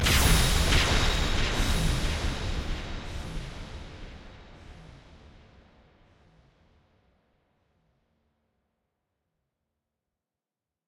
A bright explosion with echo.